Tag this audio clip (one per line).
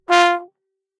brass
f3
midi-note-54
multisample
oldtrombone
short
single-note
vsco-2